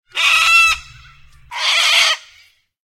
Two Australian Corella screeches.